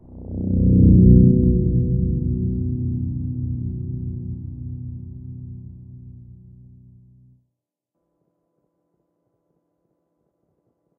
Low spacey sound
Short bass ethereal noise from an FM synth
bass, film, low, rumble, space, synth, videogame